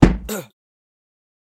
Medium Impact Man OS
Impact Male Voice
Impact, Male, Voice